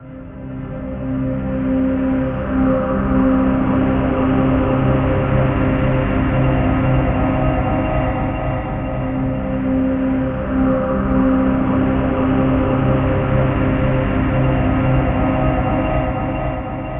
A ambient dark pad